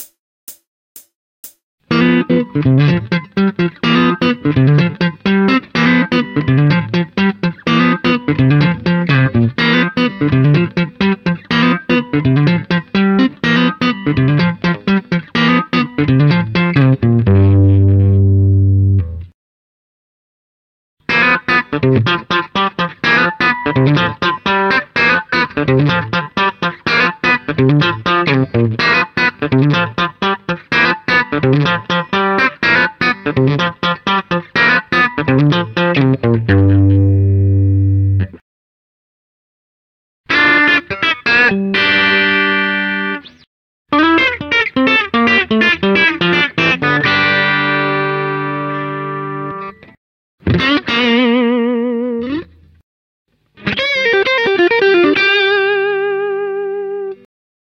Funky Tele G@125 bpm
The track contains 2 "movement" parts played around G (G7) chord, 125 bpm, neck and bridge pickup respectively. After there are 4 elements that may be used as a "spice" or for modification of the "movement"